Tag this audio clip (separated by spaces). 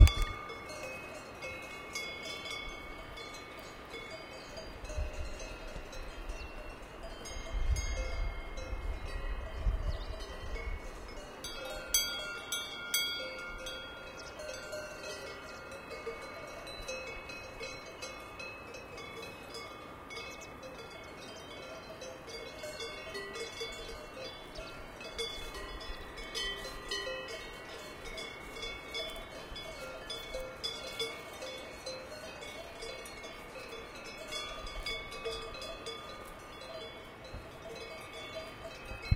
cows Cow alps bells bell cowbell cricket mountains